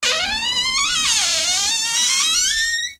Recording of the hinge of a door in the hallway that can do with some oil.